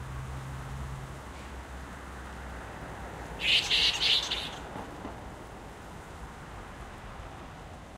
Recording of a bat screeching in a nearby tree late at night; faint cars can be heard in the distance.
Recorded using a BP4025 microphone and ZOOM F6 floating-point recorder.
Scream, Alien, Screech